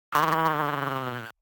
FrankenFurby Purring
Samples from a FreakenFurby, a circuit-bent Furby toy by Dave Barnes.
freakenfurby, electronic, furby